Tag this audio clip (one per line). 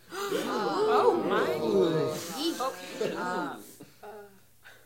theater
theatre